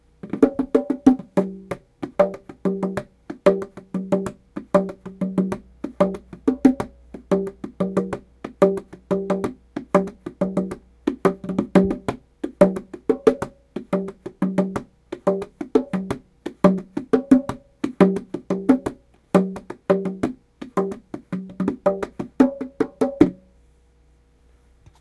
Binaural Bongos (mic test)
Testing the Sennheiser MKE 2002 Binaural Microphones (circa 1973), playing bongos resting on my lap. The microphones are designed to be mounted either on a dummy head or - as I use them here - a user's own ears.
Binaural recordings require headphones for full effect.
binaural, bongos, microphones